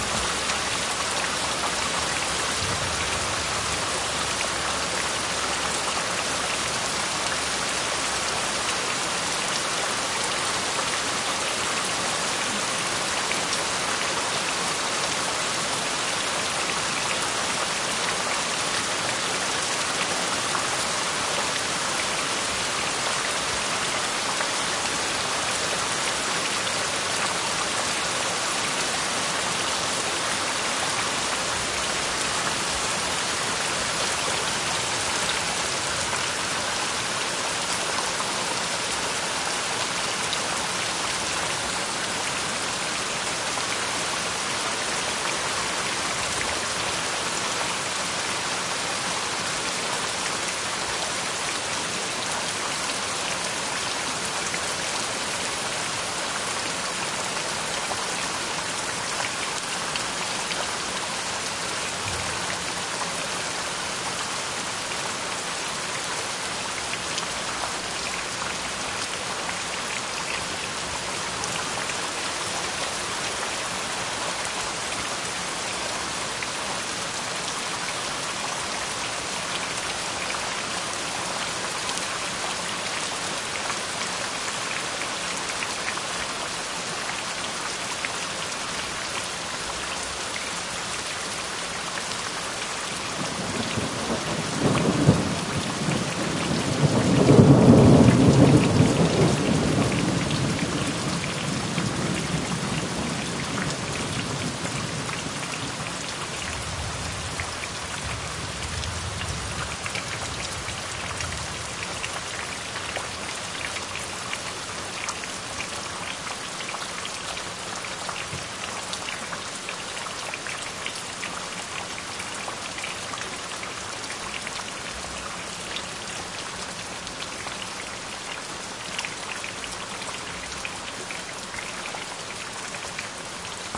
Hard Rain
recorded at Schuyler Lake near Minden, Ontario
recorded on a SONY PCM D50 in XY pattern
hard, rain